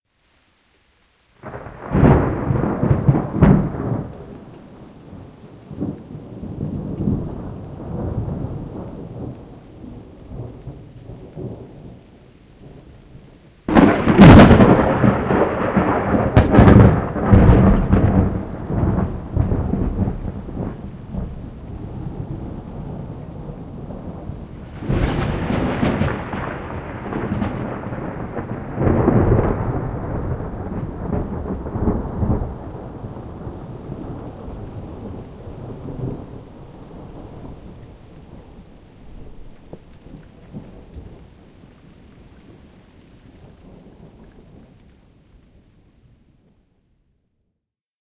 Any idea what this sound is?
Three different close-by lightning strikes with background rain. Recorded with Rode NT4 and RME fireface pre-amp and converter. There is a slight distortion but I think it adds to the dramatic effect. The sound pressure of the close-by strikes took me by surprise. Recorded July 5, Utrecht, Netherlands.